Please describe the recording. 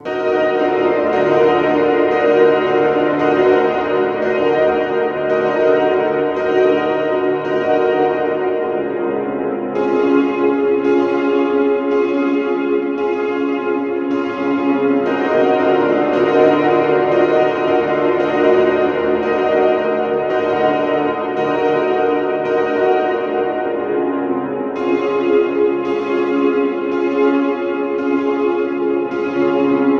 horror music for space film its like Alien by kris klavenes
did this on keyboard on ableton hope u like it :D
terror, dramatic, horror, film, scary, freaky